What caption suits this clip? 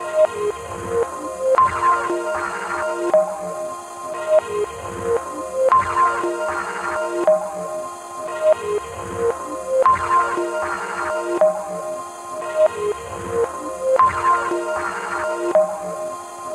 Beep Blip Loop
Can't remember how I made it, though its a great to mess with. I played around laying chords underneath, beats and stuff. Sorry cant remember bpm. Enjoy!
ambient, beep, blip, electro, electronic, glitch, interesting, loop, strange, synth